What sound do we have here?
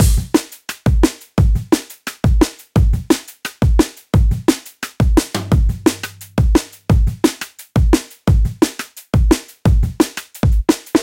Simple Acoustic break